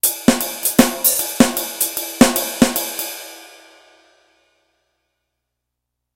jazz beat using an SPD-20